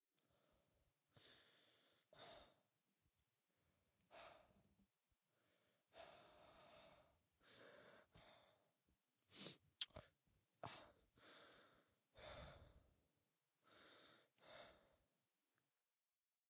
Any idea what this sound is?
Rough Breathing
Me breathing heavy